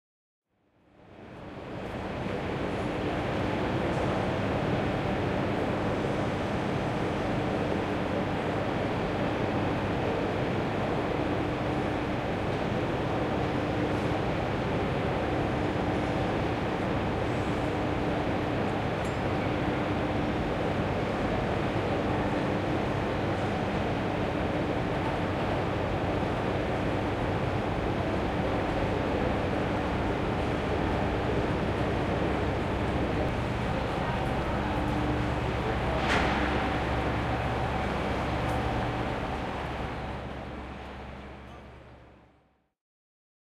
808 St Pancras ambience 2
The general sounds and ambience of a large station - in this case London St Pancras, now beautifully refurbished and with a direct Eurostar connection to Paris and beyond.
ambience, atmosphere, field-recording, london, platform, station, st-pancras, train